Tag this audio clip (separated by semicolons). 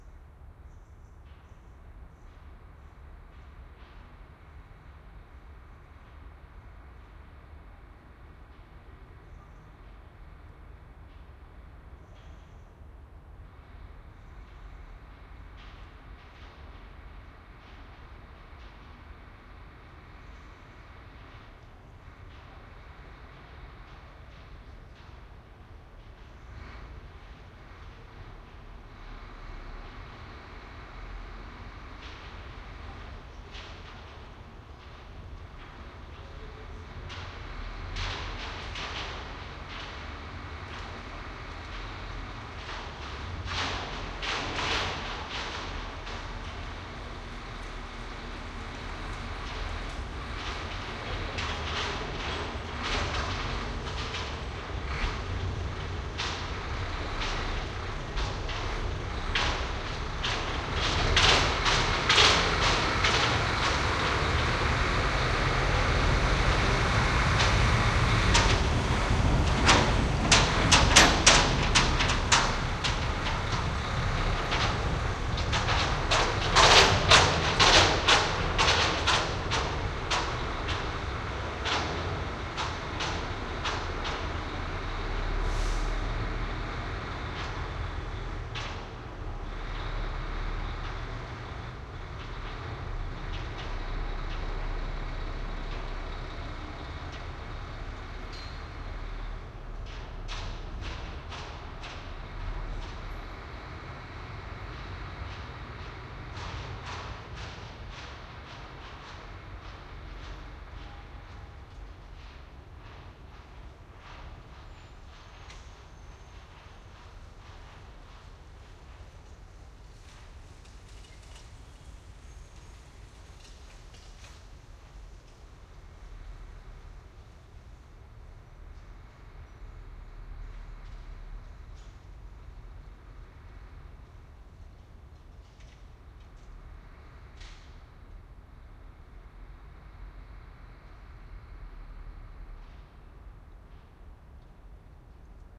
Amsterdam; city; garbage-truck; morning; noise; noisy; pass-by; passing; street; the-Netherlands; truck